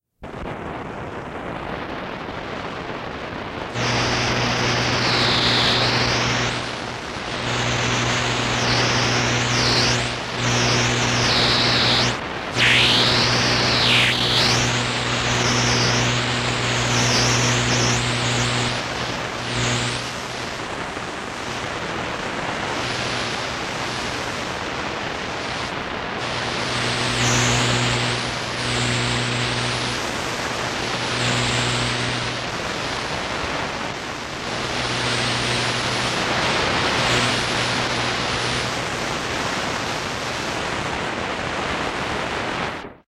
Radio Noise 1
Some various interference and things I received with a shortwave radio.
Noise, Radio-Static, Static, Radio, Interference